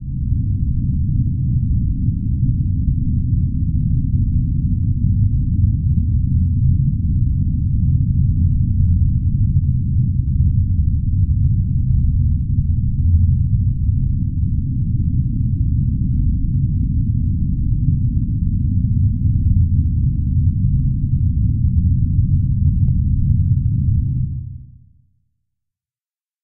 A synthesized sound that can be used for a dark cave ambient or underwater locations.